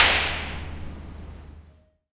Concrete Entrance Tunnel 3
This is a free recording of the entrance tunnel to the subway station in masmo :)